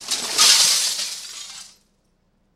glass12-proc
A bucket of broken glass tipped out above a 1m drop. Some noise removed, audio normalized.
glass, field-recording, broken-glass, pour